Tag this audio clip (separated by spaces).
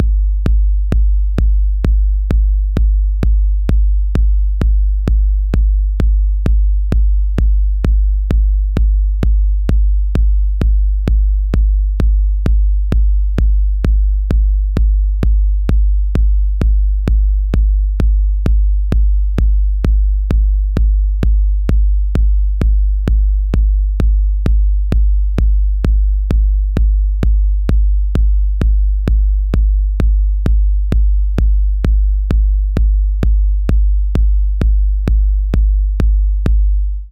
kick drum